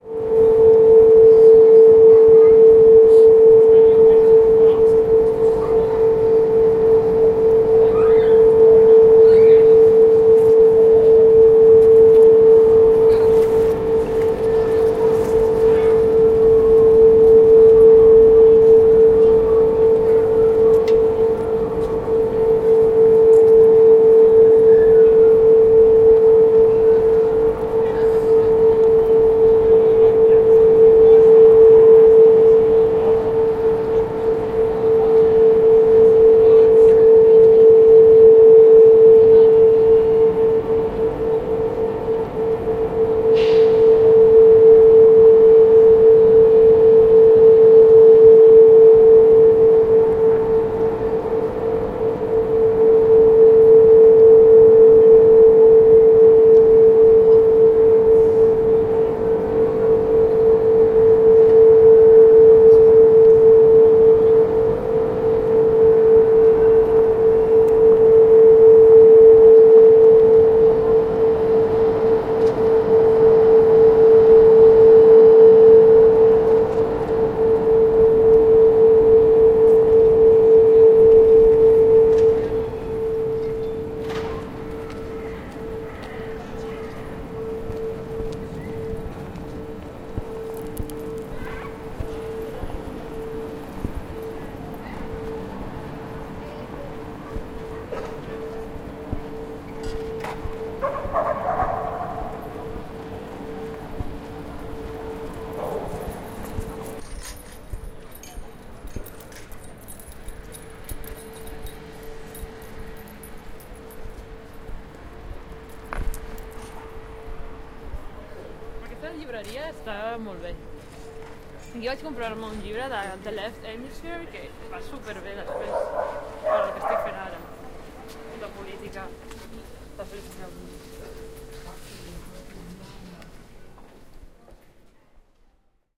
amsterdam drone 1
Drone coming from a huge car-park ventilation system at Museumplein in Amsterdam.
After recording the drone, I turned 180º and I went towards the Stedelijk Museum.
Recorded with a zoom h1n.
amsterdam, drone, field-recording, hypnotic, real, soundscape, ventilation